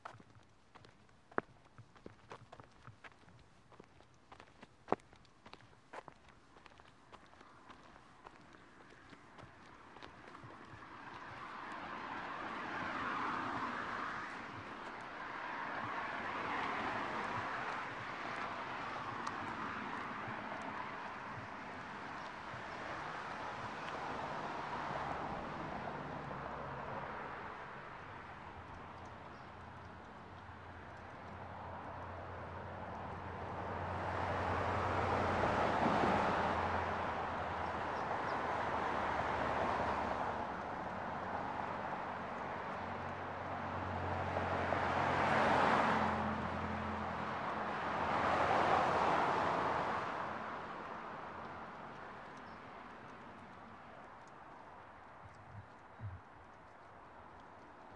Wet footsteps microphone pointed at feet, wet car bys